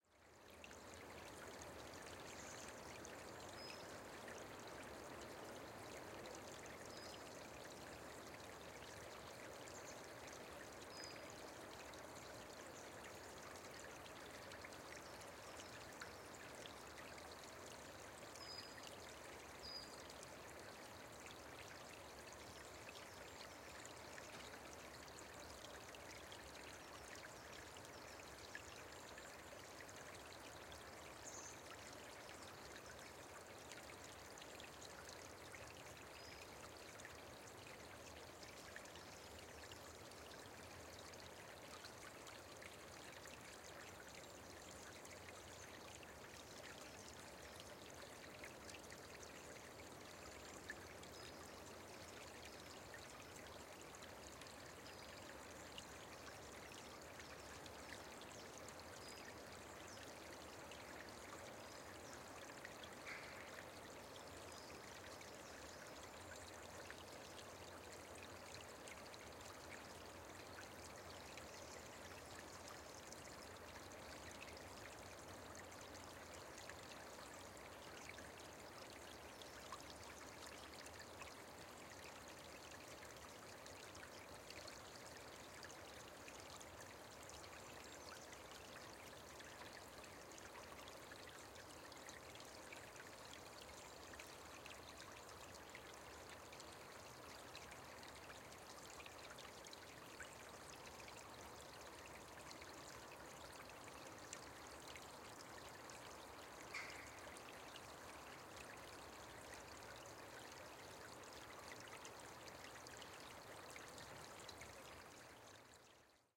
FOREST Quiet flow of a river, close-up
"Bois de L'Ermitage", a forest surrounding the Villers Abbey,Villers La Ville, Belgium.
Recorded the 21st of february of 2014, at 12pm.
2x Apex 180 ORTF - Fostex Fr2le
Birds, flow, Forest, river, Trees, winter